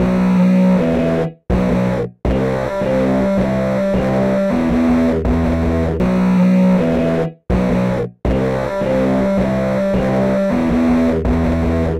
guitar riff
This is a heavy rock-riff I thought up and used to play a lot of years ago. It kind of stuck with me and I have worked it out in MMM2006, and it is slightly altered. Hope you enjoy!
riff, style, heavy, hardrock, guitar